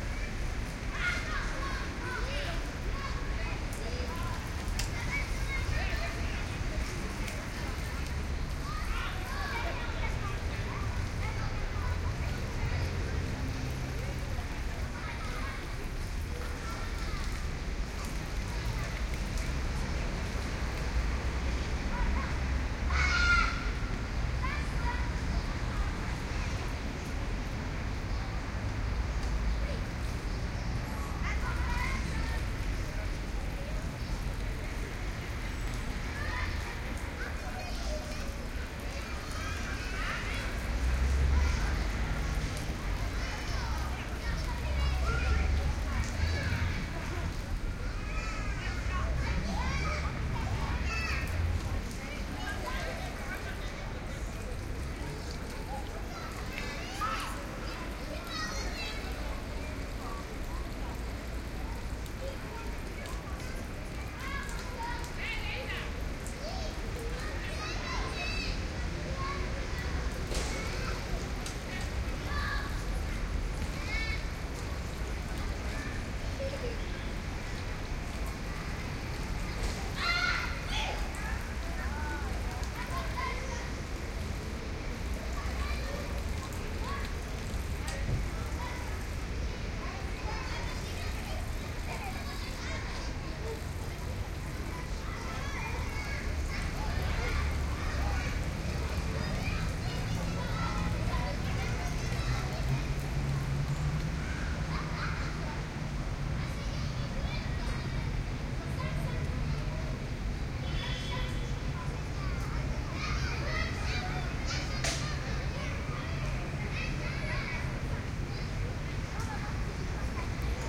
Paris Square Trinité
Paris, France, a playground Place de la Trinité. Made with Zoom H4 recorder and binaural Core sound set of microphones. July 2012
Cars passing by, kids playing, distant water fall, voices...
ambience, binaural, field-recording, kids, traffic, urban